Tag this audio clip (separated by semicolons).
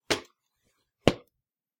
Footstep; ladder; metal; Run; Step; step-ladder; Walk; walkway